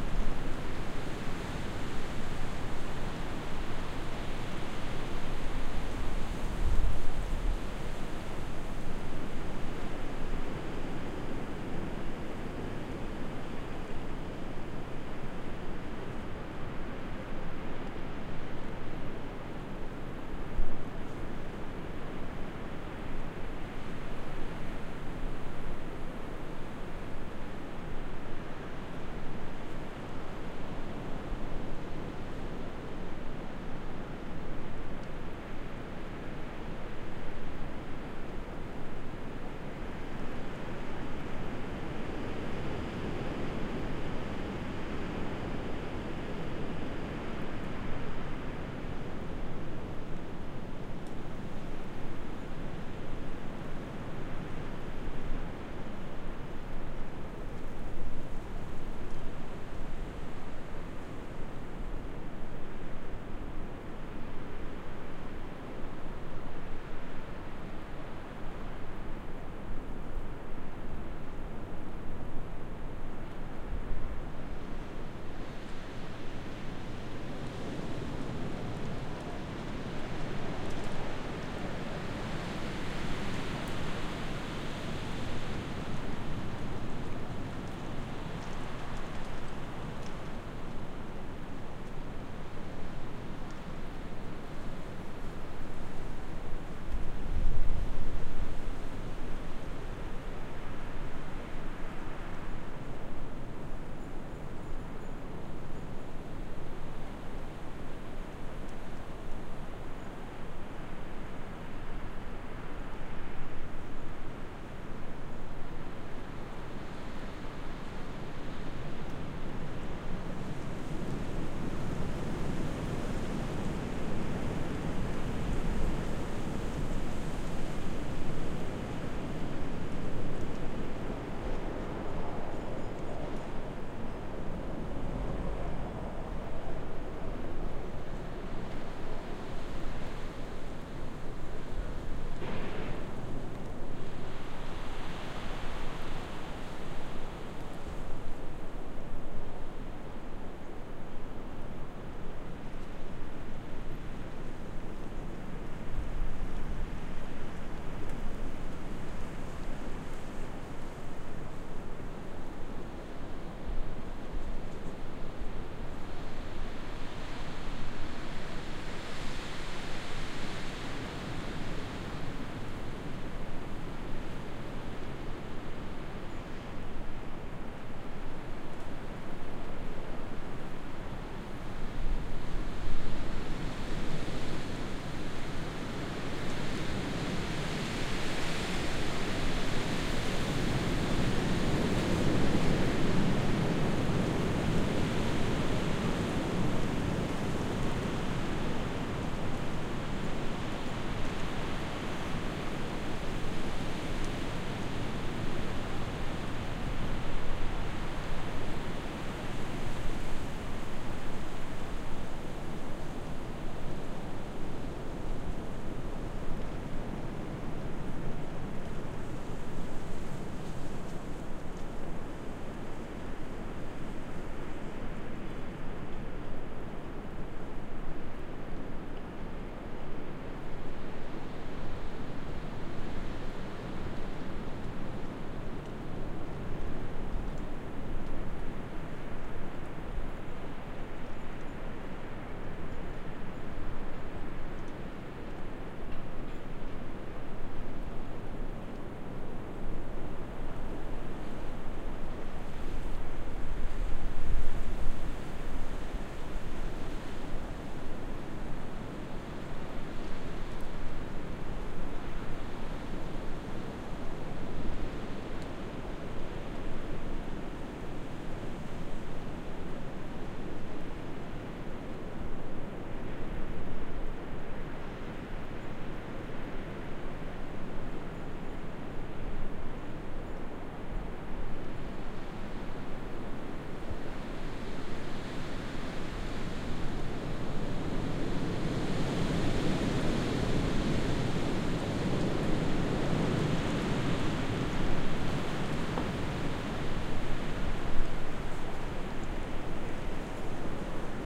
Winter Evening Wind (02.01.21)
9:20 p.m. on 2/2/21 in Huntington, NY. Recorded with a Sound Devices Mix Pre-3 and an Audio-Technica BP4025 microphone (with a Rode Blimp Windshield). The file can be looped.
frost, snow, ice, Wind, evening, winter, cold